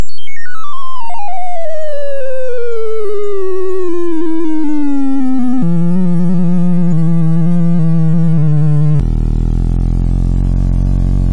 flower stereoscope
sfx, effect, fear, spooky, animation, horror, creepy, fx, scary